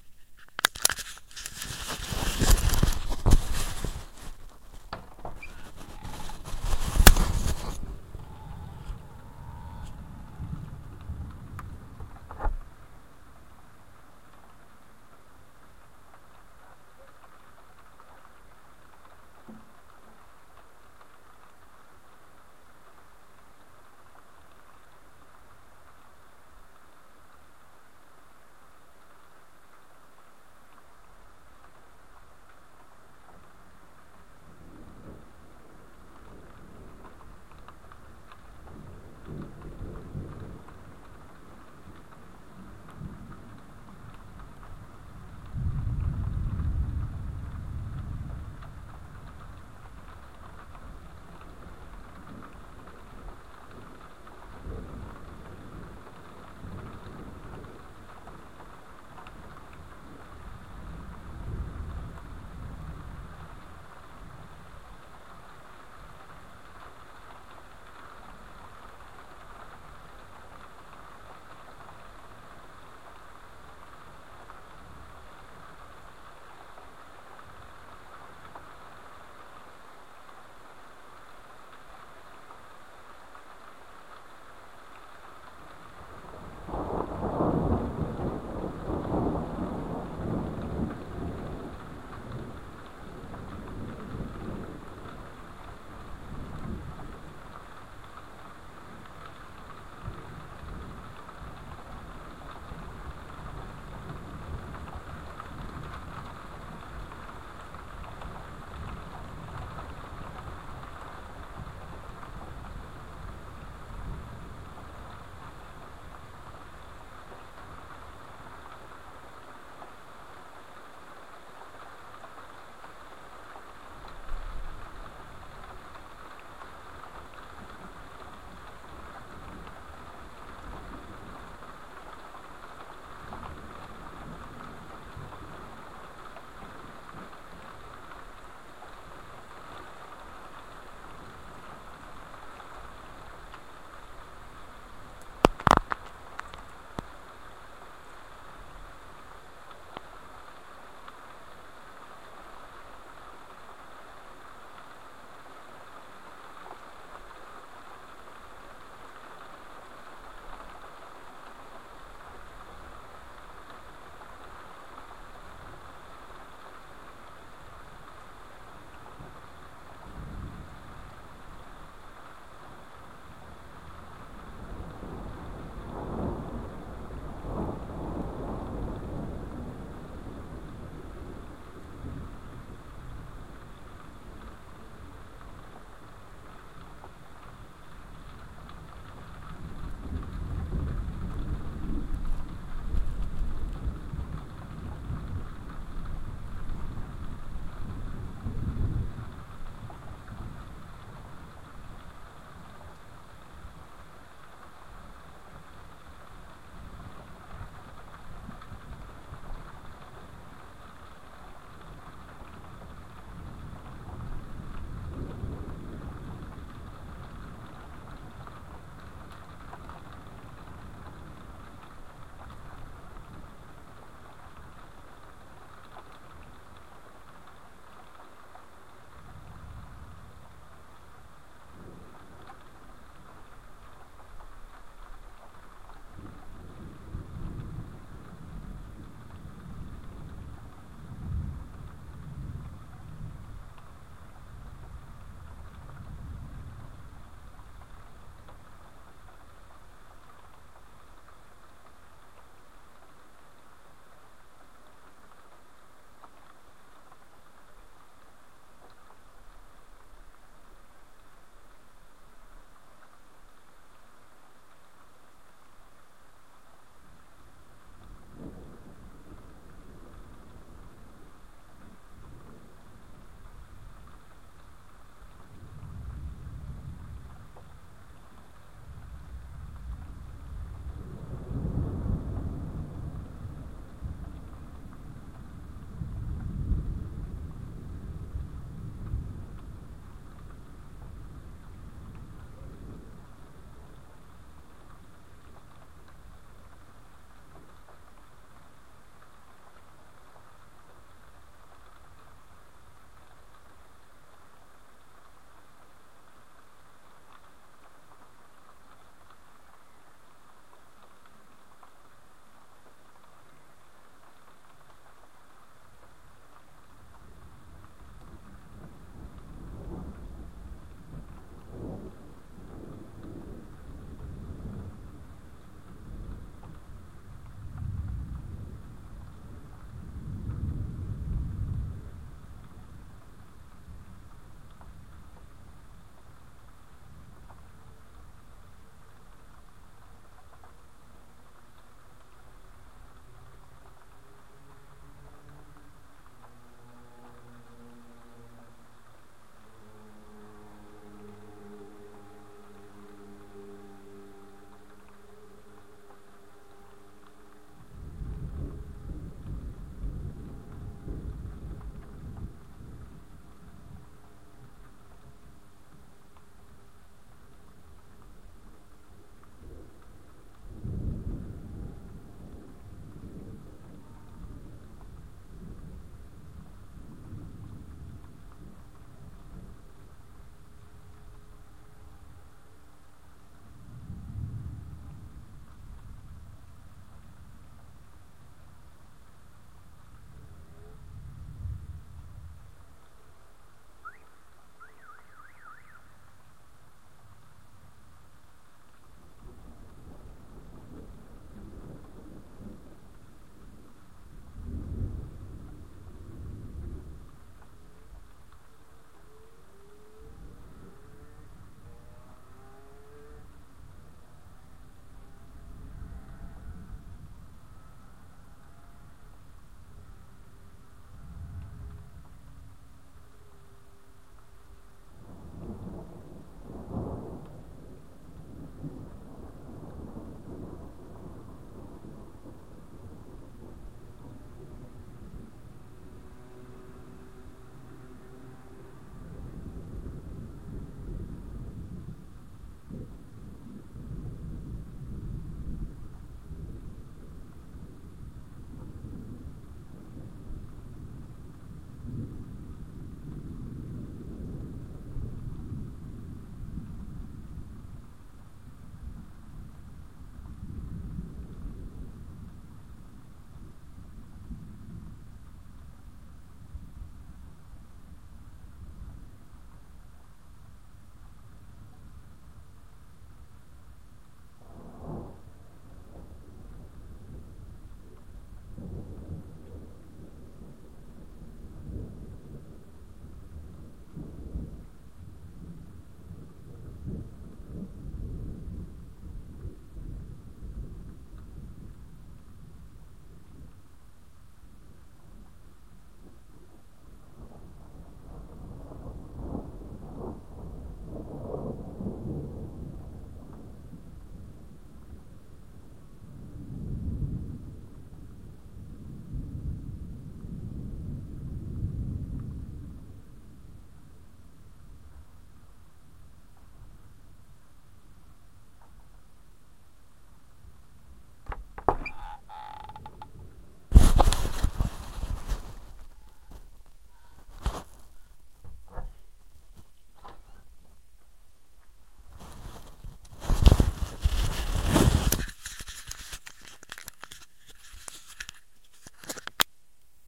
This was recorded in a thunderstorm by my MP3 player into a small bag during lightning flashes and thunder. (location: Pécel, east side of Budapest, Hungary). (Original version)
lightning, storm, thunder